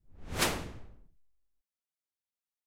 A simple whoosh effect. Medium-length and high.